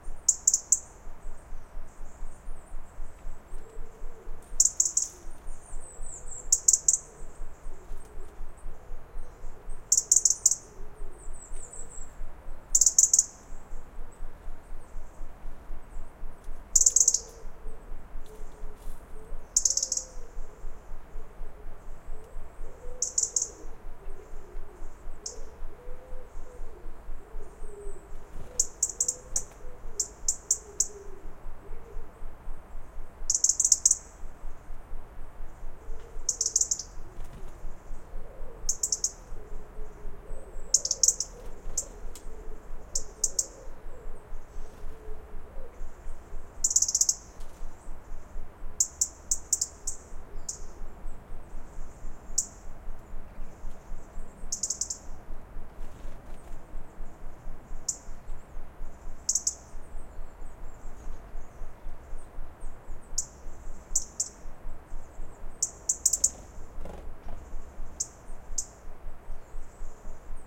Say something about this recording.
Robin alarm call
Alarm call of an Erithacus rubecula. Recorded in August in Scotland using the BP4025 microphone, a Shure FP24 preamp and a Korg MR2...and that Robin wasn´t far away from the microphone.
alarm, birdsong, field-recording, Robin, scotland